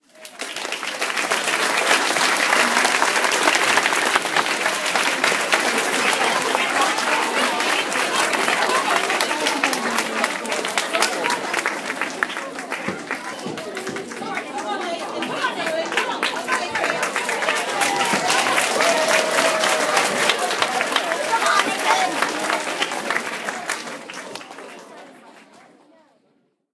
Large crowd applause sounds recorded with a 5th-gen iPod touch. Edited in Audacity.